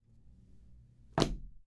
Putting a book on a table rather loudly